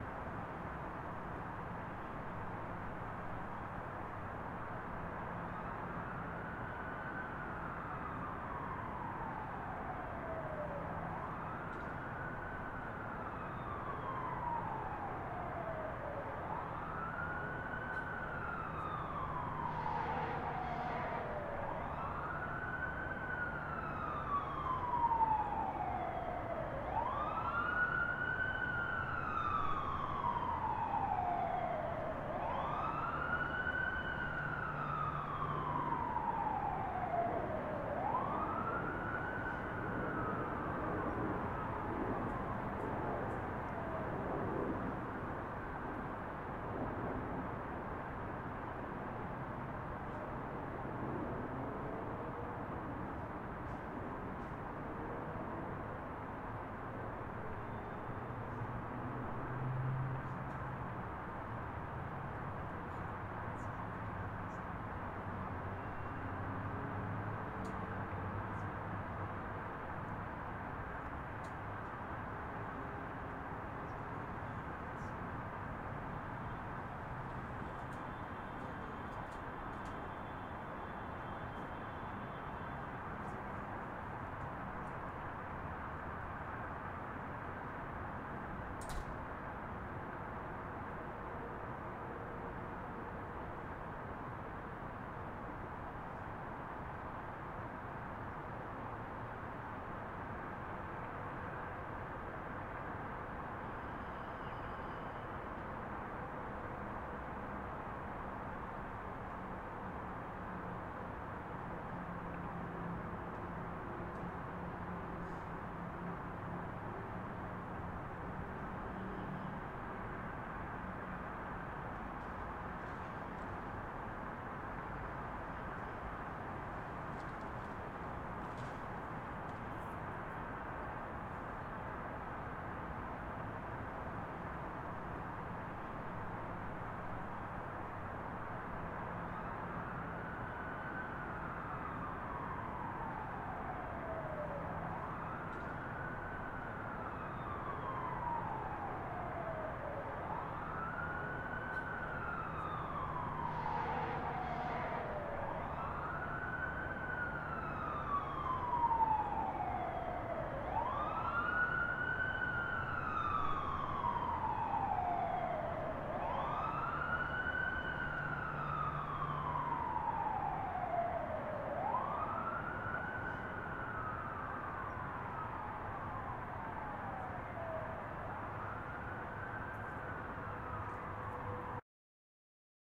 Outside Night With Sirens (10PM)
Outside Night City: Planes, Cars, Wind, Sirens
Recorded with MXL 990 hanging from 20 feet high in an alley 2 blocks from the interstate in Seattle's University District at 10 PM in the late April
ambulance, soundscape, fire-truck, police, background-sound, noise, ambient, wind, highway, field-recording, fire-engine, seattle, urban, planes, cars, white-noise, sirens, background, night, atmosphere, ambience